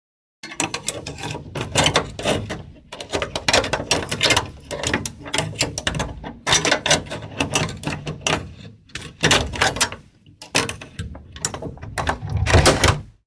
Some Foley i did for a tv-production.
For professional Sounddesign/Foley just hit me up.